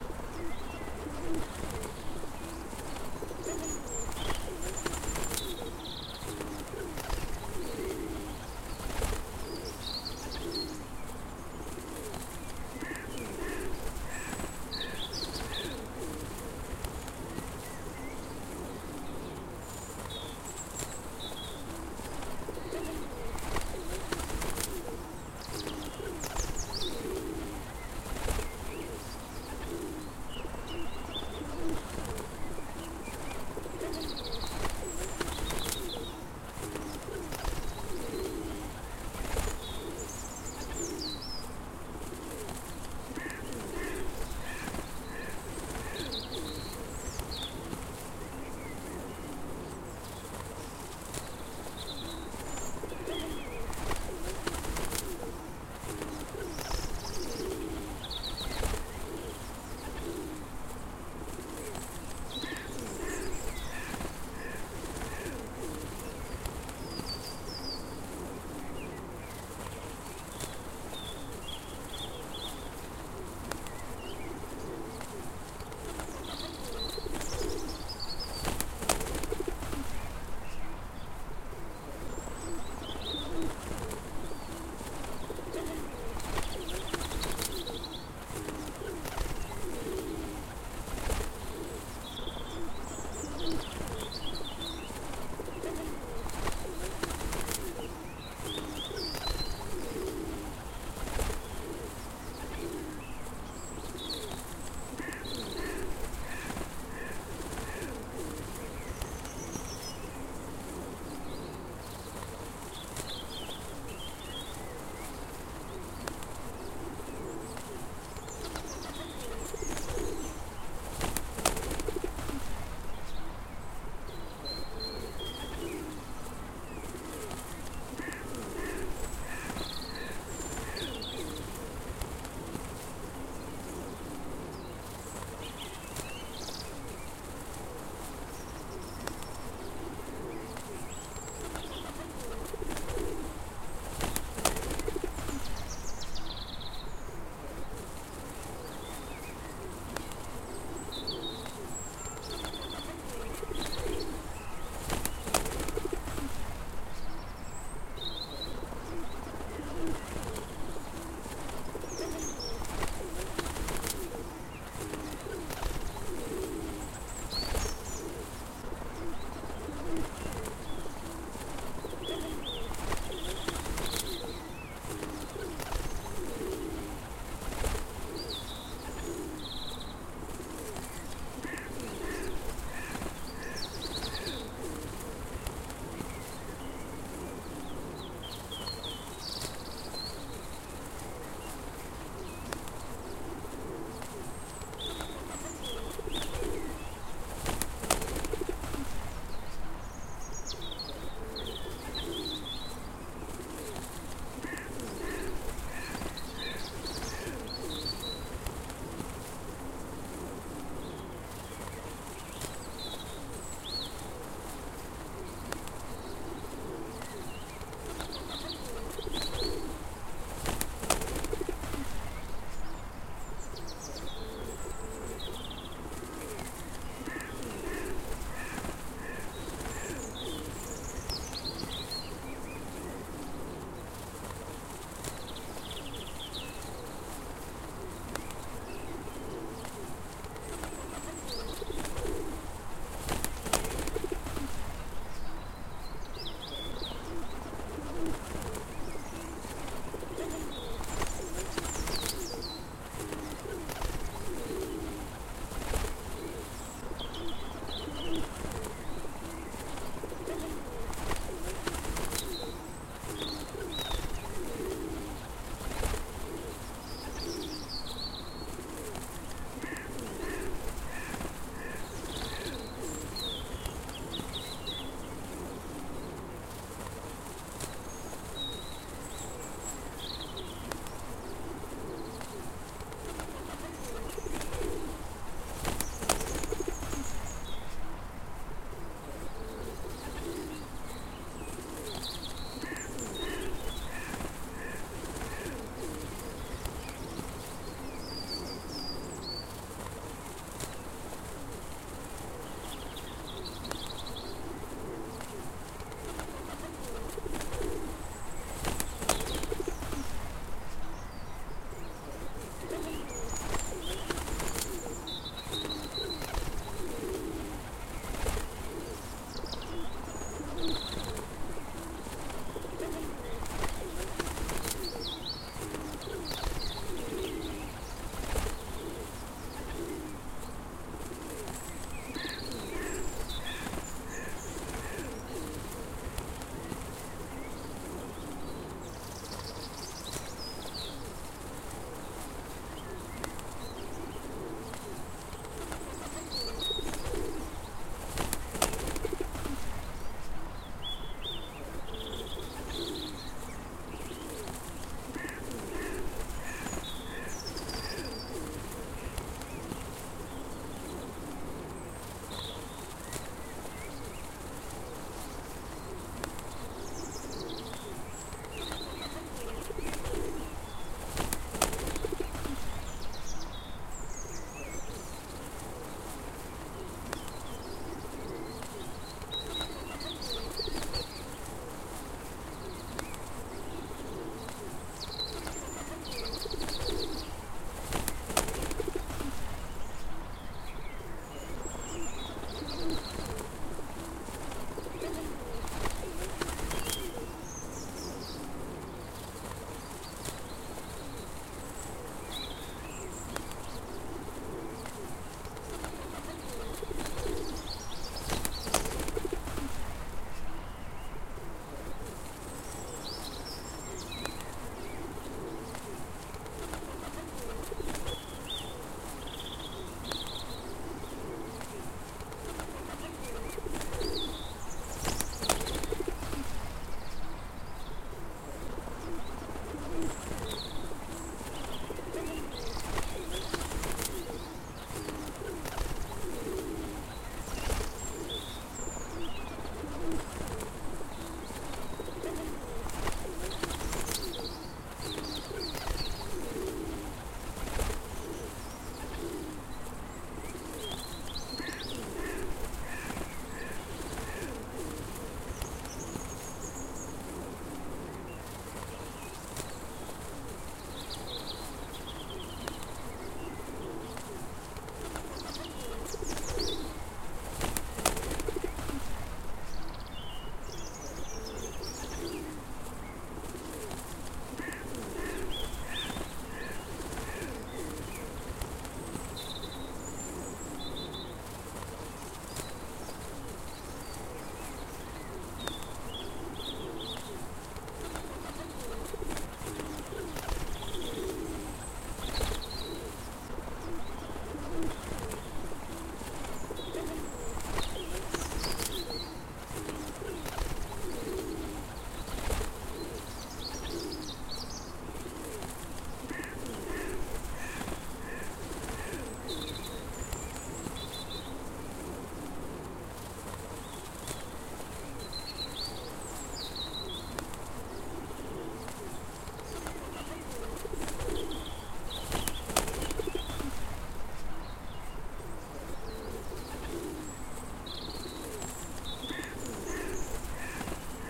Sounds like an afternoon at the park. An extended edit of pigeons cooing by Zabuhailo (originally 30 secs) mixed with a lowered volume birdsong by Squash555 to create outdoor park ambiance of quiet birdsong, outdoor tone, and pigeon noises. Created for use in Made Up Talk Show, a comedy podcast.
bird, birds, birdsong, field-recording, morning, nature, park, pigeons, spring